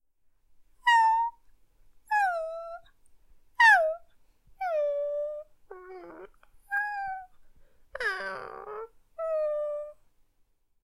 dog whining 1
CZ, Czech, dog, Panska, whine, whining